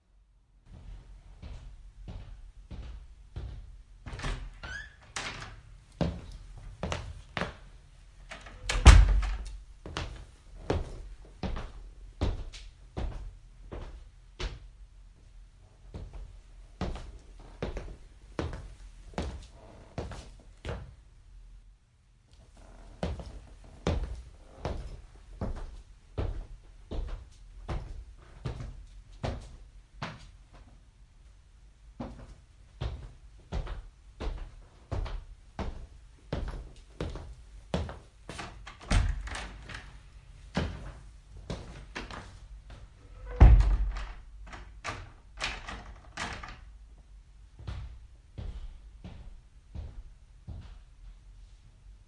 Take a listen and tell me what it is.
A man with hard-sole dress shoes walking on a creaky wooden floor and opening and closing a door.